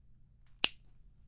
snap from fingers
clean, finger, fingers, finger-snap, fingersnap, snap